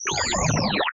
Strange animal illustration manipulated graphically then fed through image synth.
element, image, synth, soundscape, loop